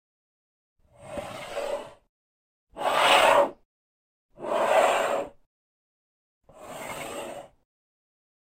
sliding glass on wood
Glass slidind on a wooden table.
move, sliding